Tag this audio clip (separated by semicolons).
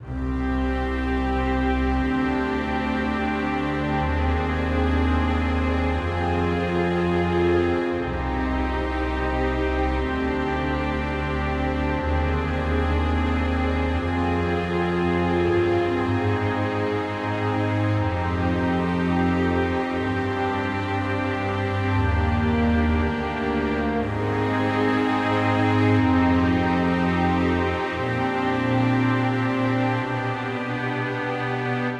120BPM; cinematic; classical; creepy; dark; ensemble; Key-of-C; loop; loops; orchestra; orchestral; sad; strings